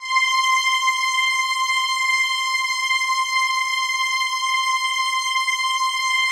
STRINGY-4791-2mx2PR D#6 SW
37 Samples Multisampled in minor 3rds, C-1 to C8, keyboard mapping in sample file, made with multiple Reason Subtractor and Thor soft synths, multiple takes layered, eq'd and mixed in Logic, looped in Keymap Pro 5 using Penrose algorithm. More complex and organic than cheesy 2 VCO synth strings.
Multisample, Bowed, Strings, Synth